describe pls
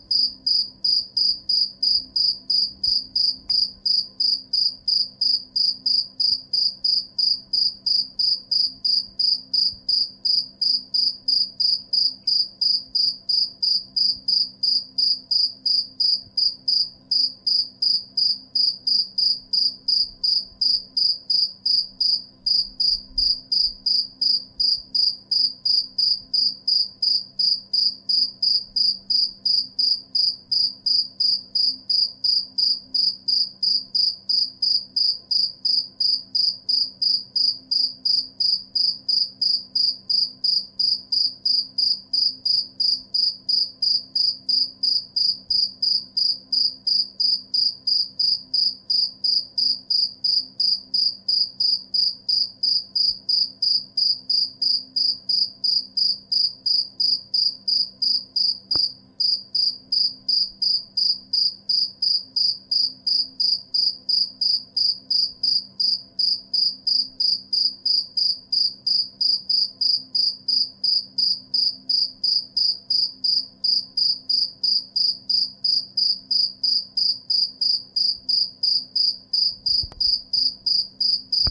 single cricket 1